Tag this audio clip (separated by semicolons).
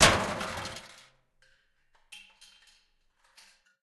bin; bottle; c42; c617; can; chaos; coke; container; crash; crush; cup; destroy; destruction; dispose; drop; empty; garbage; half; hit; impact; josephson; metal; metallic; npng; pail; plastic; rubbish; smash; speed; thud